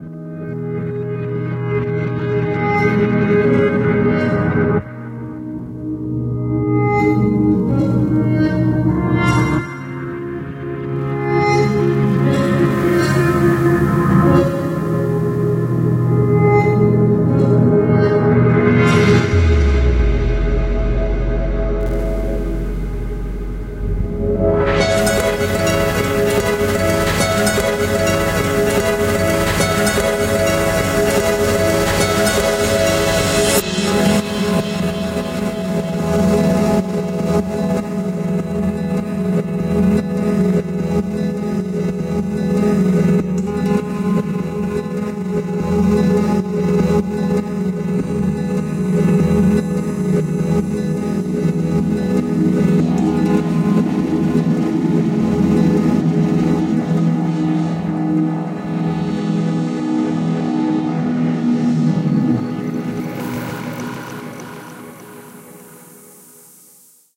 Nuclear Bass Drop Guitar Sample Experimental